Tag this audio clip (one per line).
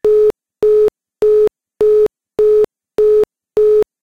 busy
occupied
cellphone
phone
telephone
ton
dial
reserved
freizeichen
dialtone
besetzt
hand
moblie
telefon
mobilephone
note
tone
mobile-phone